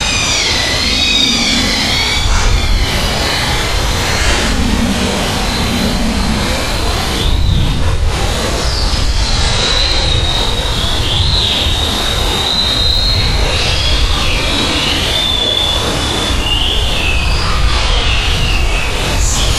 Edited version of one of my noisy Jersey seagull recordings processed with Paul's Extreme Sound Stretch to create a ghostlike effect for horror and scifi (not syfy) purposes.
bird, demonic, evil, ghost, haunting, paranormal, scary, seagull, spooky, stretch, stretched, texture